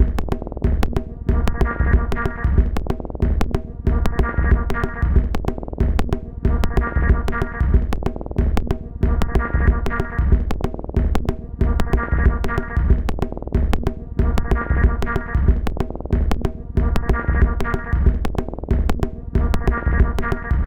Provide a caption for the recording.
marching stuff
beat, harsh, industrial, loop, minimal, percussion, techno